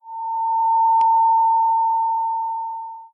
Security Scanning 03 Remastered
Security Scanning
Can for example be a robot patrolling or lasers that you have to avoid in order to not get detected and / or killed!
Artificial-Intelligence, bank, film, game, laser, movie, patrol, robbery, robot, scan, scanning, security, spaceship, tech, technology